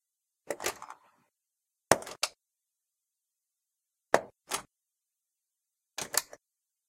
The latch door from an old coin-operated washing machine being opened. Series of instances.